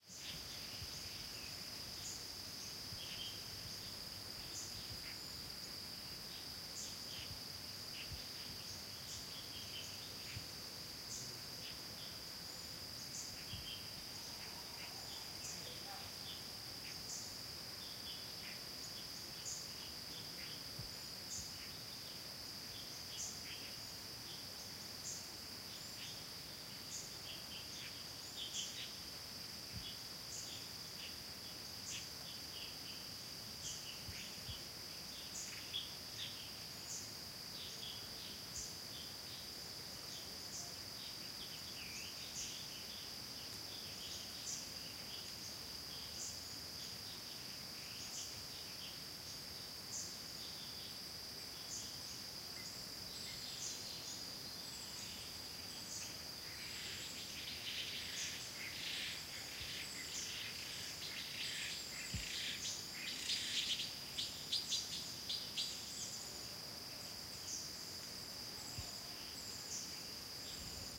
191109 ambience forests constant insect with few birds
Ambience, forests